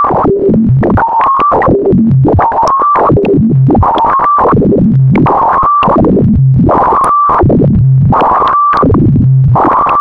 Another cacaphony of broken bleeps but this time it repeats rhythmically.
Created with a feedback loop in Ableton Live.
The pack description contains the explanation of how the sounds where created.